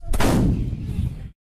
Howitzer Artillery Gun Shot Sound Effect 03
Firing a howitzer.
agression, anti-tank, army, artillery, attack, bomb, boom, caliber, cannon, canon, conflict, defense, explosion, fight, howitzer, loud, military, projectile, schuss, shot, tank, war